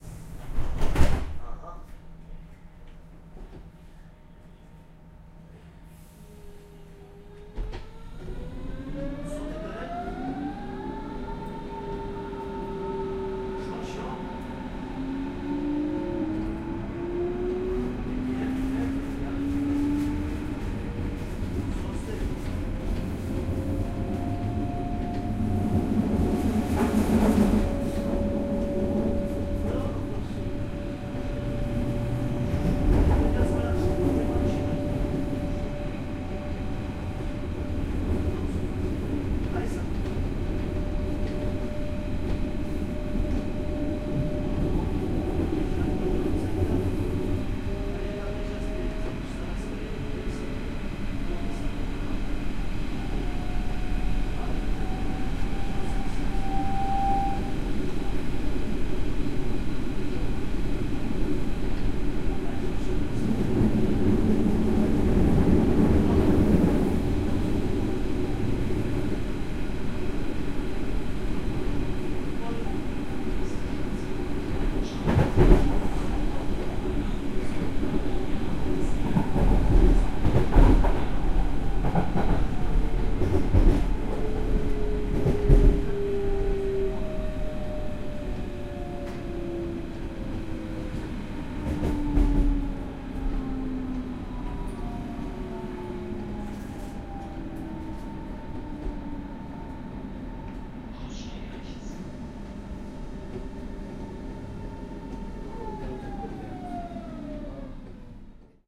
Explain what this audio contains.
Train Ride
Rode for 1 stop on the S-bahn. Recorded Sept 3 2018 in Berlin, DE with Zoom H4N.